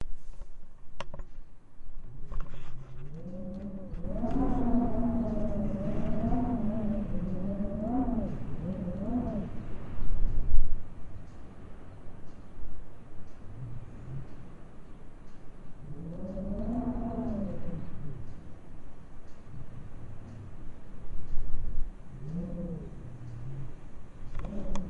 Wind Heulen Wind howling 2
2, Heulen, howling, Wind